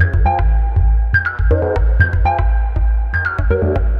BS Electricity Bass 3
Dark and raw minimal and techno bass loop (120 BPM)
Acid, Bass, Dark, Loop, Minimal, Techno